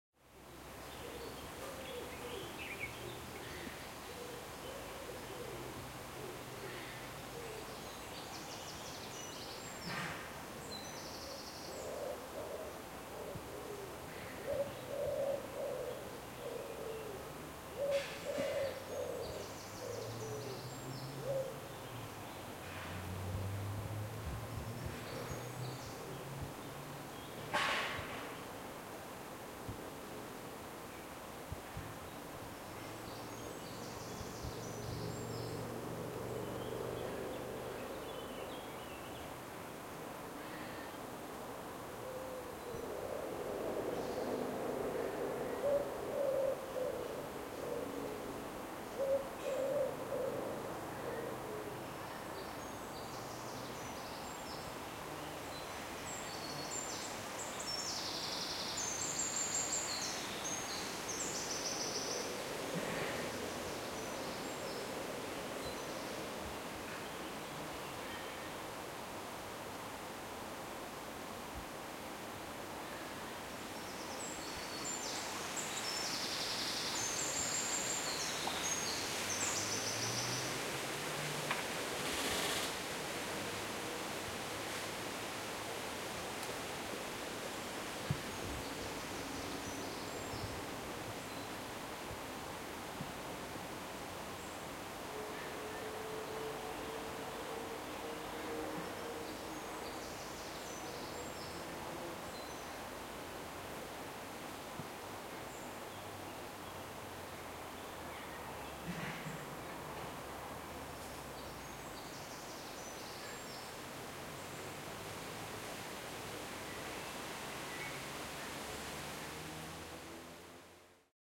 Woods ambience summer UK birds light wind through trees
Stereo recording of summer ambience within a wood close to houses and roads. Sounds of birdsong, wind in trees, distant activity and traffic.
ambience, ambient, birds, birdsong, breeze, distant-traffic, field-recording, forest, light-wind, nature, summer, trees, UK, wind, woods